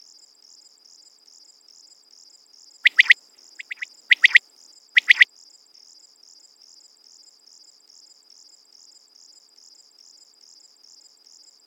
a ix of a quail with crickets and enough time to fade in and out of crickets
aodsigpoiuerhgpsiuoghpoiu, idfughsperiughspriough, anp